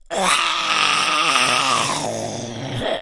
Monster growl 9
A monster/zombie sound, yay! I guess my neighbors are concerned about a zombie invasion now (I recorded my monster sounds in my closet).
Recorded with a RØDE NT-2A.
Apocalypse, Dead, Growl, Horror, Invasion, Monsters, Scary, Scream, Zombie